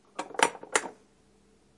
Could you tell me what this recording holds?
Vacuum cleaner button